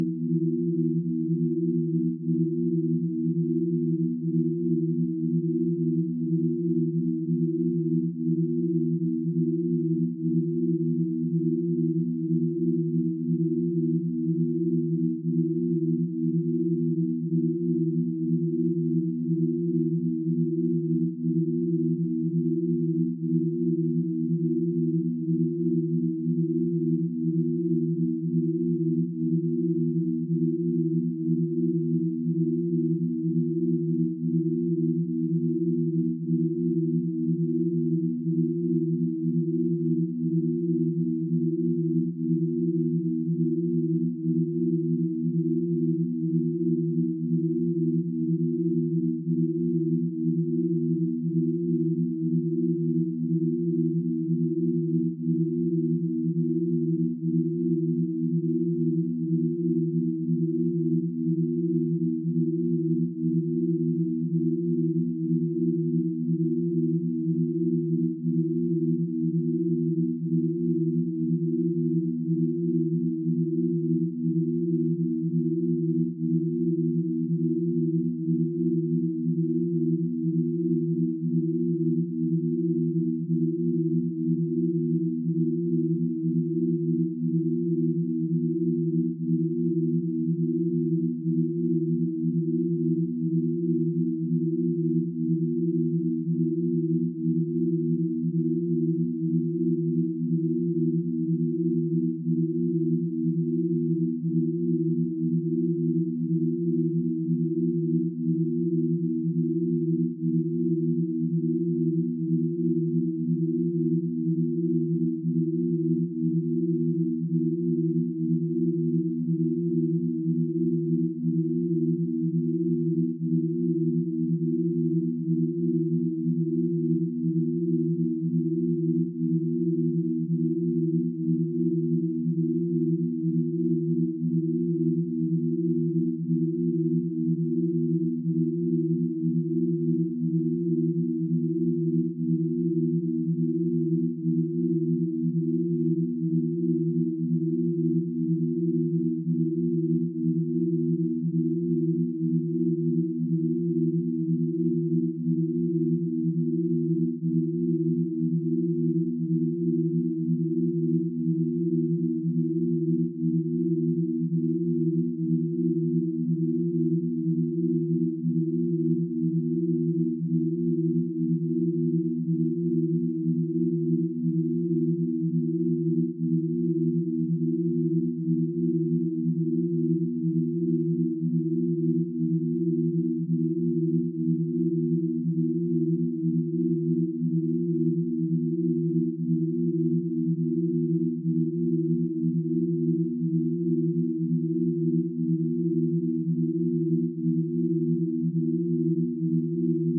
Imperfect Loops 08 (pythagorean tuning)
Cool Loop made with our BeeOne software.
For Attributon use: "made with HSE BeeOne"
Request more specific loops (PM or e-mail)
ambient, background, electronic, experimental, loop, pythagorean, sweet